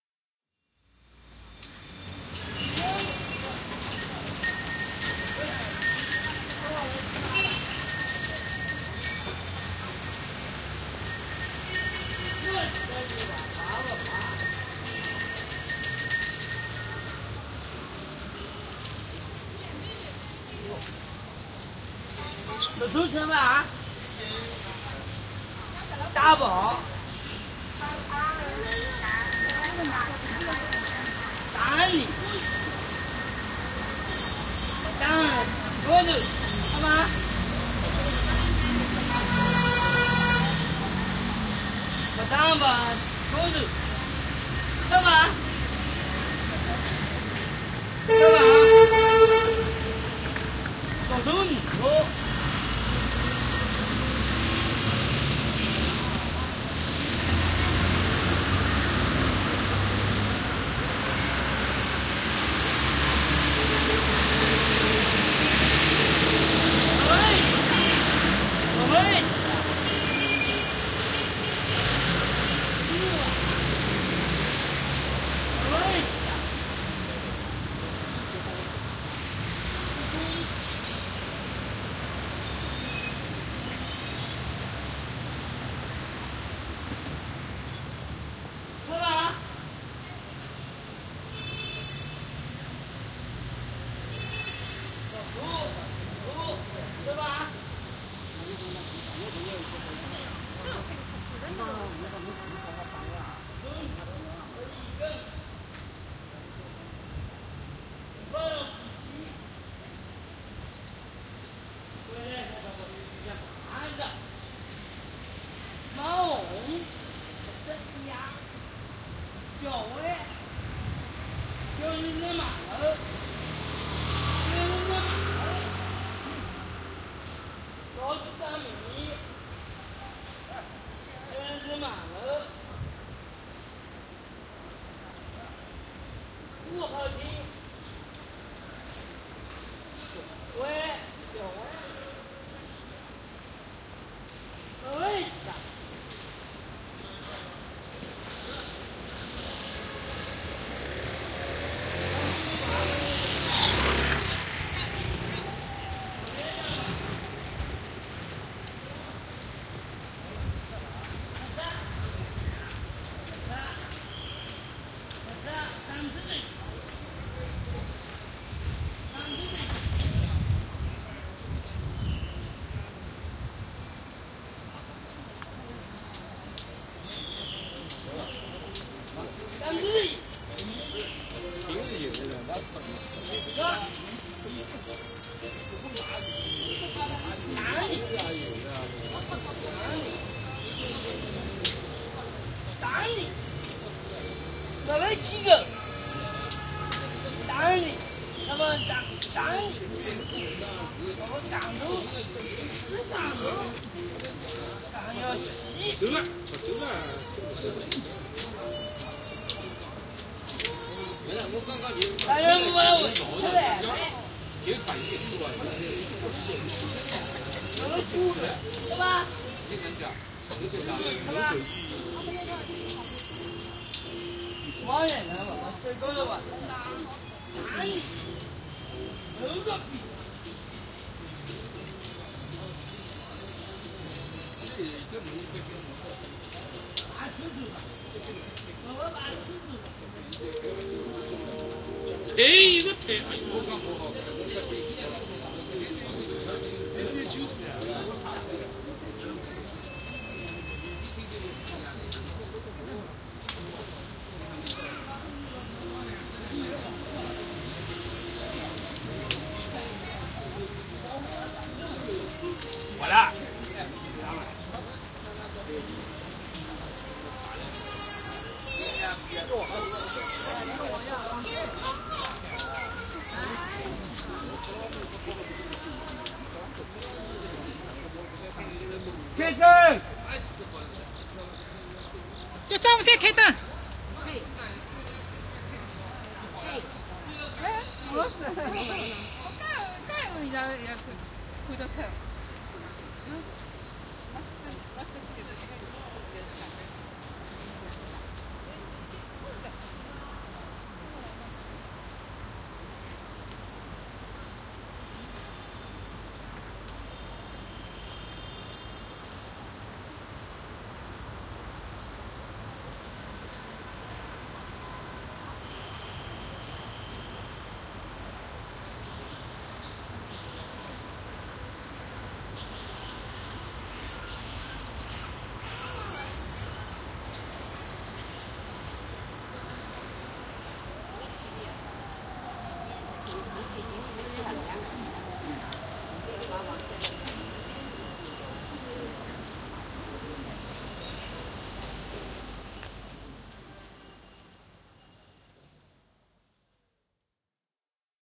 tracking a soliloquize guy in Shanghai Streets
D50 with Panasonic wm-61 binaual mics